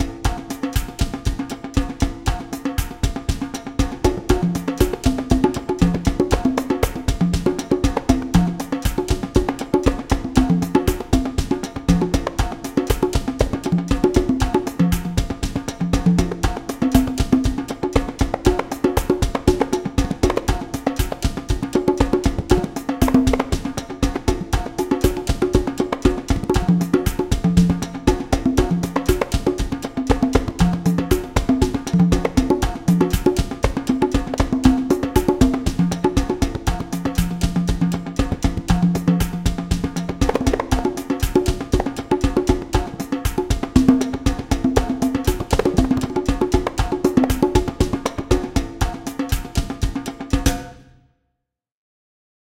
DrumJam Conga Solo Sample Ethno Music Drums 119bpm 2022-07-15 19.12.40
DrumJam Conga Solo Sample Ethno Music Drums 119bpm
119bpm, Bass, Cinematic, Closed, Conga, Conga-Set, CongaSet, Dance, Drum, DrumJam, Drums, EDM, Electric-Dance-Music, Ethno, Film, House, Kick, Movie, Music, Sample, Short, Solo